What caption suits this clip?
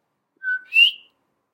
one sound sample of a single coqui.
recorded using Abelton Live & MacBook Pro mic.